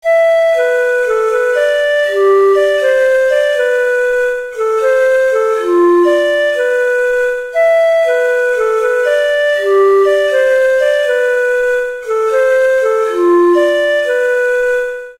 This is a little tune that I made. I hope you like it!